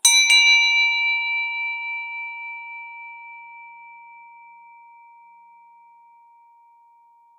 Sound of a bell hitted by a small metal ball inside it.